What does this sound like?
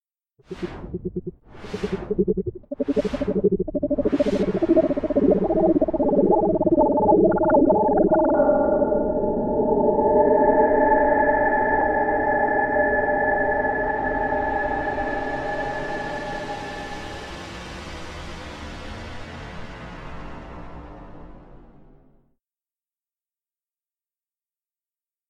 Alien Message and Arrival
A composed sound effect of an Alien Message and Space Ship Arrival. Possibly better suited for some sort of space amphibian? The last few seconds of the sound clip might also be well suited for horror-suspense strings.
sci-fi, spaceship, alien, space